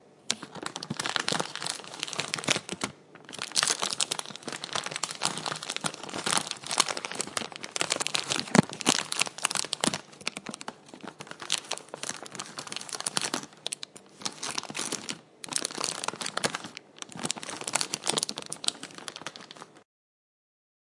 mke geldenhuys 190282 OWI CHOCOLATE wraper
It is an sfx sound of unwrapping a chocolate, its also when I use that same paper to close the chocolate
chocolate, window